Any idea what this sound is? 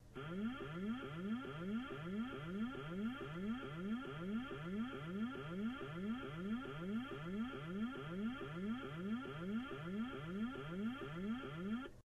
A nice alert tone